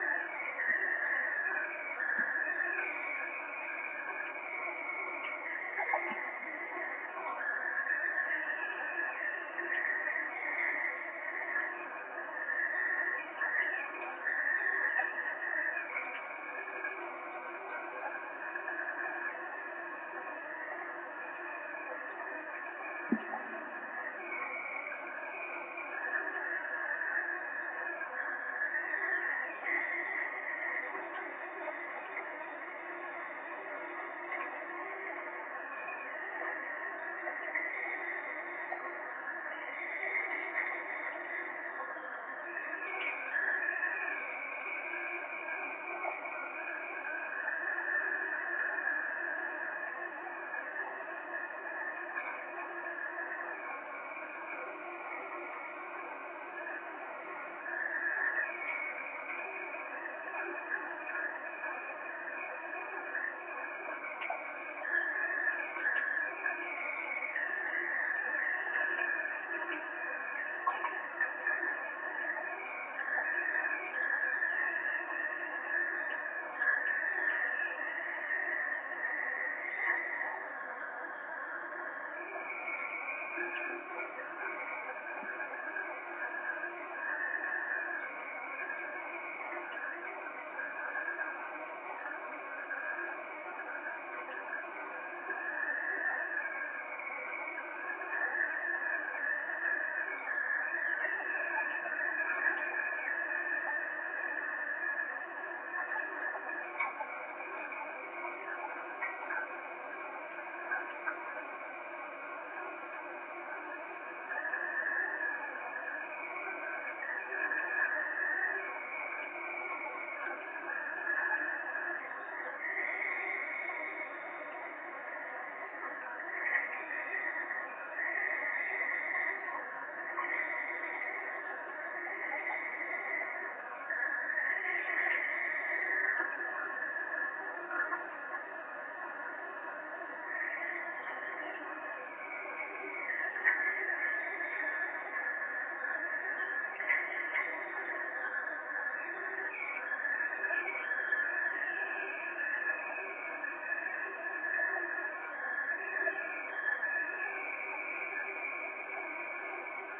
AMB tuberia rota
broken pipe
recorded with me66
procesed with live ableton
ambiance, field